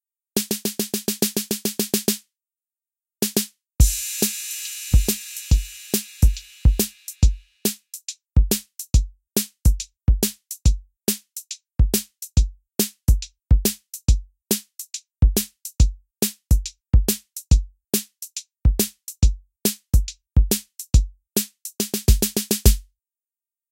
50's rock n roll beat 808 drum sound
A 1950's Rock n' Roll Beat similar to that used by Elvis using an 808 drum sound with no effects added.
n, roll, rock, 808, snare, beat, elvis